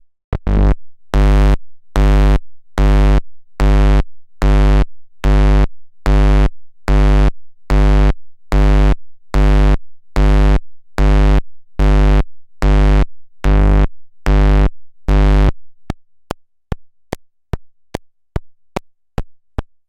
EM magnetic valve05
electromagnetic scan of a magnetic valve - on and off like a techno bassline. sounds a lot like synthesized sound.
electromagnetc, electronic, pulse, scan, synthesizer, valve